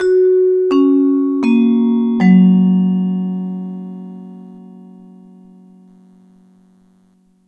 Decreasing intro for an announcement. Recorded with Yamaha PF-1000 and Zoom H5, edited with Audacity.